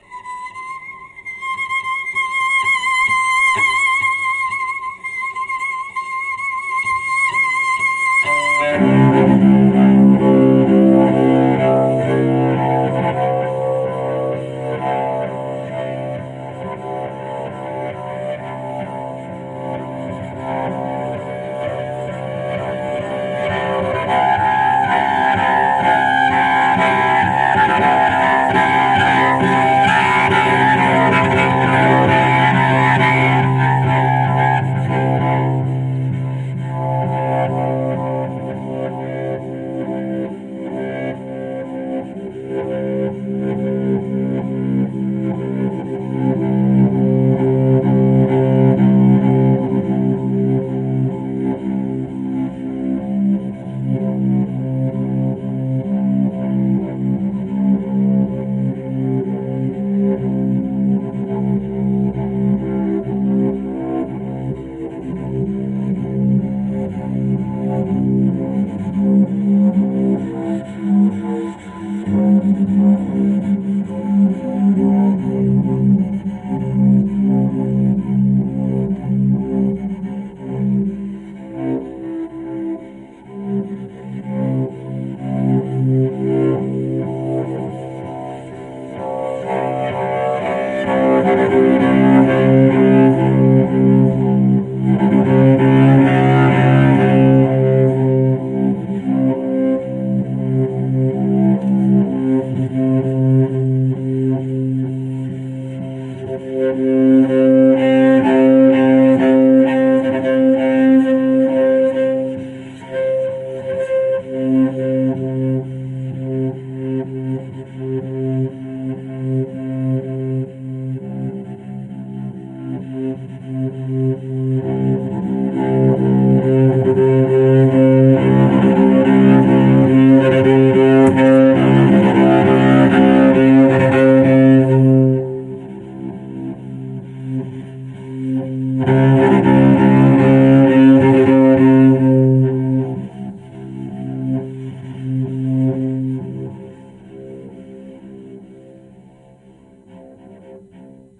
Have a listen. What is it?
Cello Play C - 09
Recording of a Cello improvising with the note C
Instruments, Acoustic